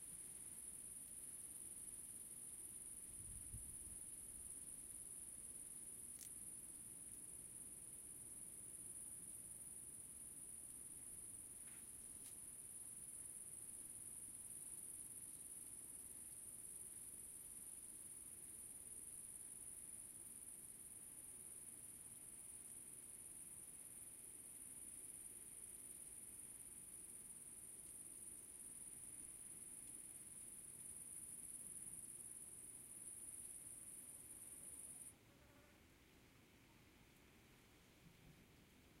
Field ambience with crickets 4
Field ambience with crickets
insects, field, meadow, crickets, ambient, field-recording, nature